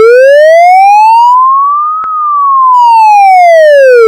///Made using Audacity (only)
Generate Chirp of 1 sec
Effect : Change Speed -2
Edit : Duplicate 2 times
Select the 3 tracks : Effect Repeat 1 time and Effect: Reverse (the new tracks)
/// Typologie
Continu tonique
///Morphologie
Masse: Son tonique
Timbre harmonique : Eclatant
Grain : lisse
Allure : Pas de vibrato
Dynamique : Attaque violente
Profil mélodique : Serpentine
Profil de masse : pas d’équalisation